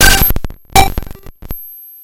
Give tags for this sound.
beatz
oneshot
hits
higher
505
distorted
bent
a
drums
glitch
hammertone
circuit
than

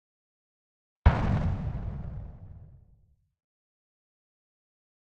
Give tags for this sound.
synthesis
explode
grenade
explosion
bomb

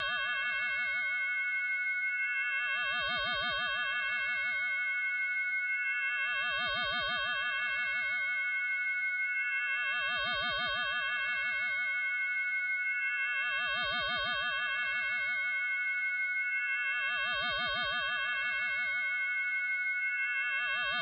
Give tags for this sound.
Futuristic
Space
Sci-fi
Noise
Alien
Machines
Spacecraft
Electronic
Mechanical

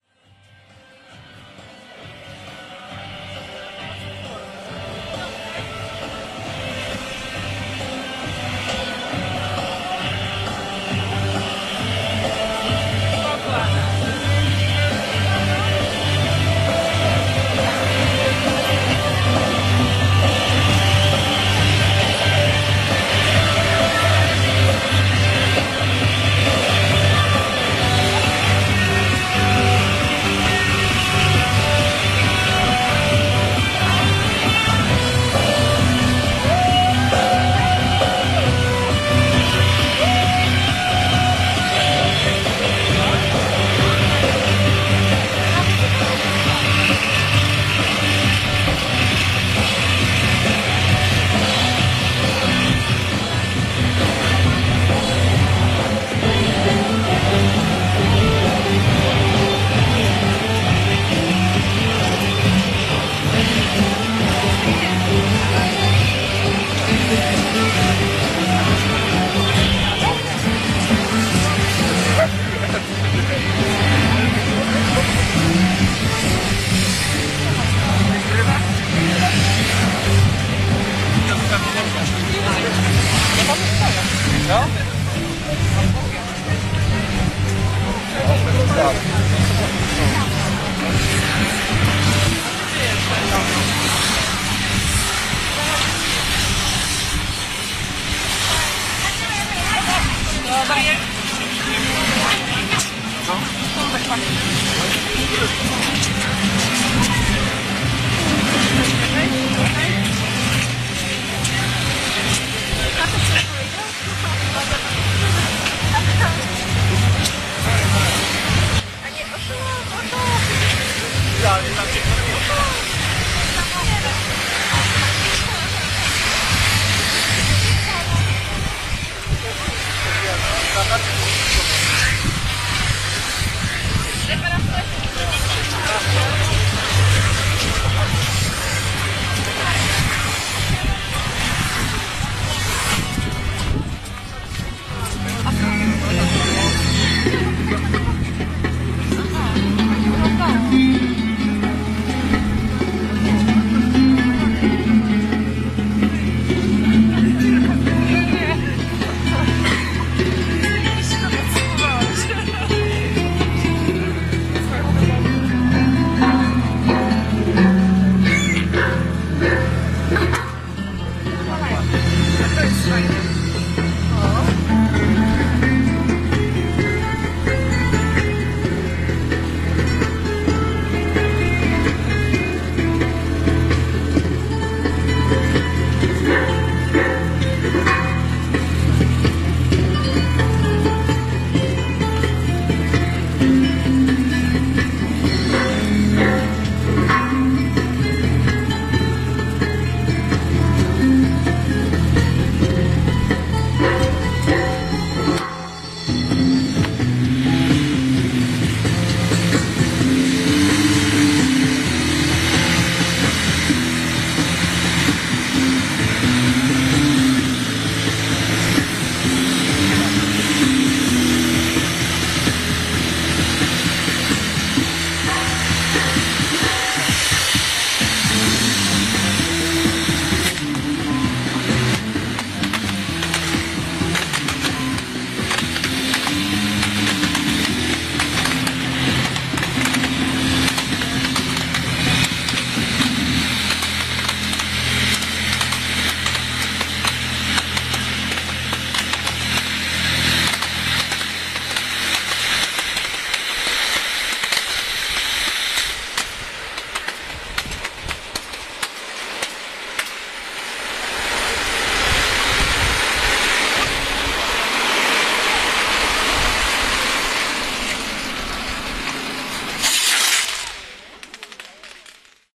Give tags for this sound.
crowd
festival
fireworks
karnavires
malta
performance
poland
poznan
theatre

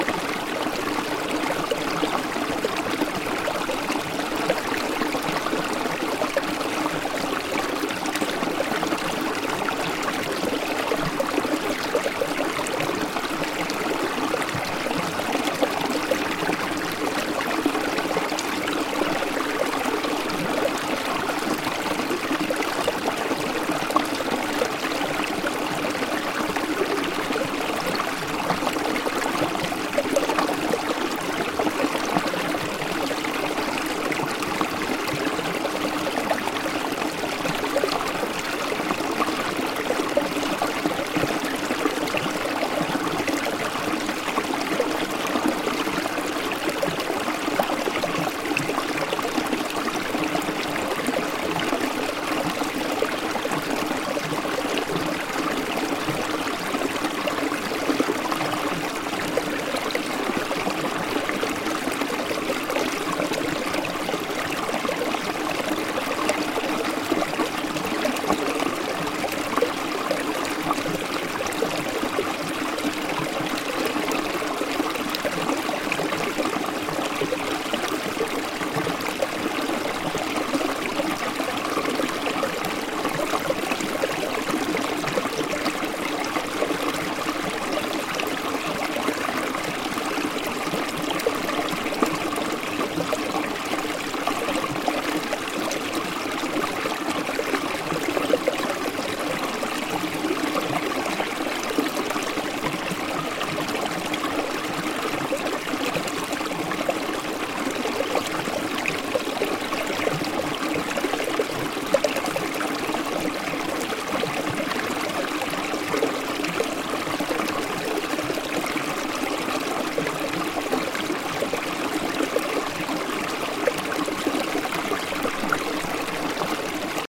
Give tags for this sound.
ambient
babbling
brook
creek
field-recording
gurgle
liquid
relaxing
river
splash
stream
trickle
water

